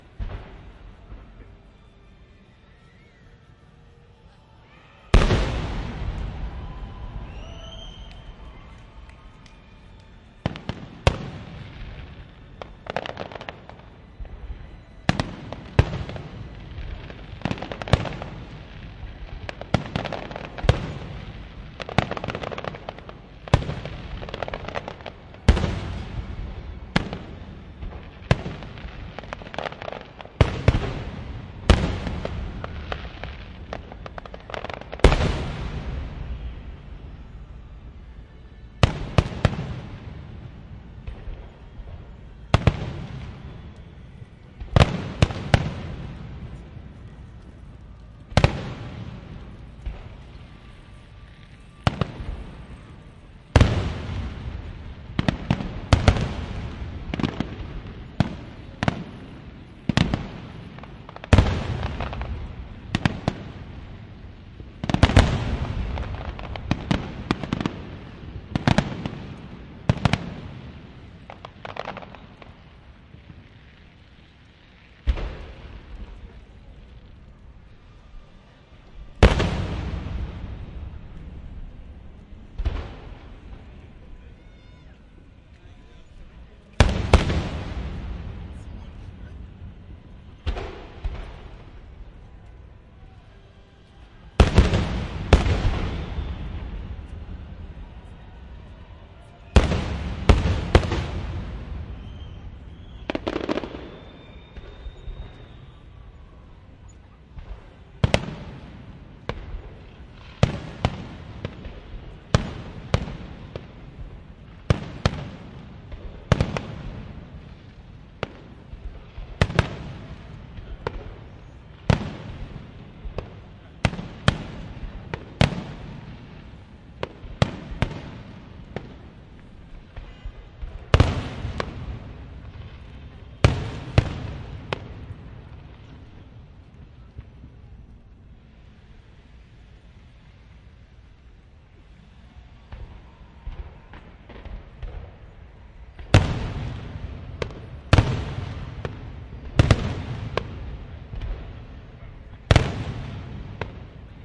fireworks big, medium various Montreal, Canada
Montreal, Canada